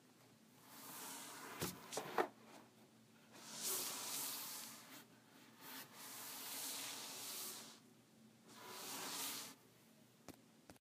Chair Sliding on Carpet
Dragging a chair across a carpeted floor.
chair, floor, carpet, dragging, furniture